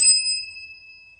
22nd chime on a mark tree with 23 chimes
barchime, marktree, chime